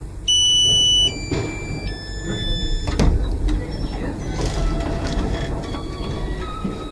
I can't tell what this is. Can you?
AVELINE Elodie 2013 2014 son1.Ascenseur
//////// Recording with using of Audacity (Time: 06,906sec)
Effect : normalize (-0,5 dB)
Effect : noise reduction (48 dB) (frequency smoothing : 220 HZ)
Effect : treble (-1,2 dB)
//////// Typologie: Itération complexe (V’’)
(début en tant que Itération variée (V’’)
////// Morphologie:
- Masse : sons cannelés
- timbre harmonique : froid, strident, profond
- Grain : rugueux
- Allure : stable, pas de vibrato
- Dynamique : attaque abrupte aiguë
Profil mélodique : variation scalaire
Profil de masse / calibre : son couplé à du bruit